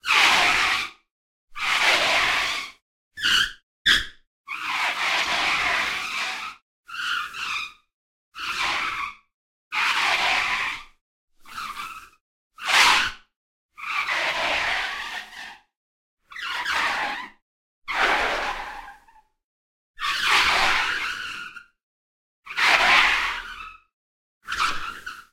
Screeching Tyres
A sequence of 16 tyre squeals that might be a useful accompaniment in a car chase scene. Each of the squeals is separated by some silence so should be easy to edit. All of the squeals go from right to left; just flip the channels if you need the opposite. If you need mono, mix the two channels together, there shouldn't be any phase cancellation issues.
These have actually been created by dragging a rubber hot water bottle over a tiled floor - this is an old Foley trick.
Recorded using a pair of Sennheiser MKH 8040s in XY configuration.
car-chase, screeching-tires, screeching-tyres, squealing, squealing-tyres, tires